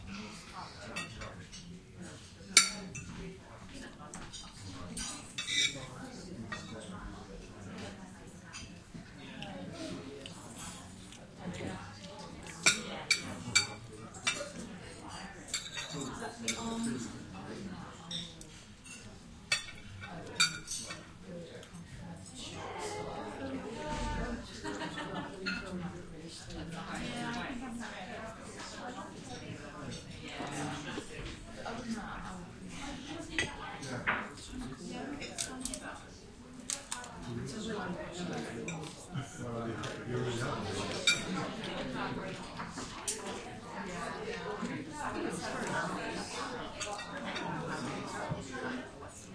UK Cafe Restaurant - medium to busy - chatter cutlery crockery
Medium to busy cafe/restaurant in the UK with chatter, cutlery and crockery sounds.
Atmos, Atmosphere, British, Busy, Cafe, Chatter, Crockery, Cutlery, England, Interior, Medium, Murmur, Restaurant, Speaking, UK, Voices